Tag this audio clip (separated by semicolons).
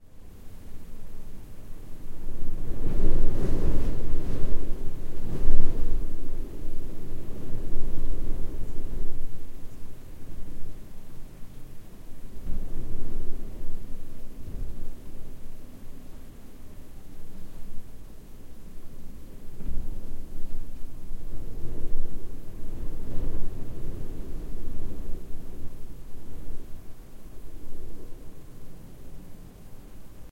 howling; blow; blowing; wind; windy